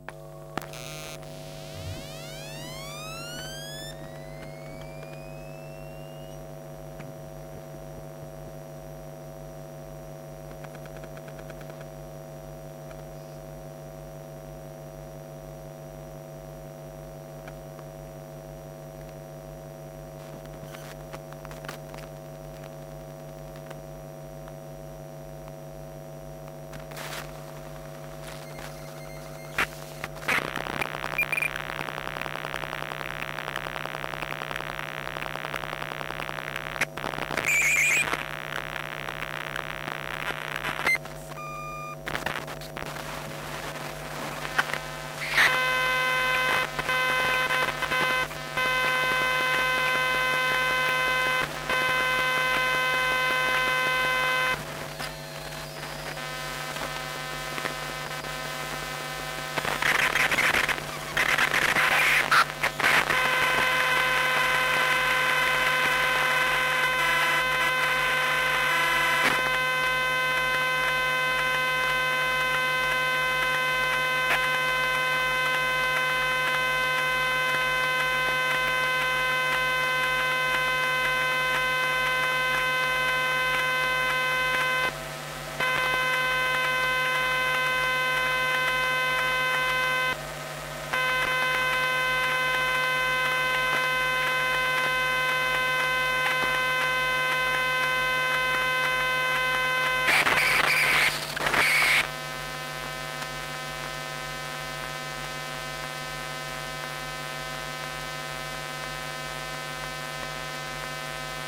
Electromagnetic noise of an old personal computer starting up.
Recorded with a Centrance MixerFace R4R and Micbooster Electro Pickup, in April 2022.
boot, device, effect, electric, electromagnetic, electronic, electro-pickup, experiment, field-recording, fx, interference, machine, noise, noises, PC, sound, sounds, soundscape, start-up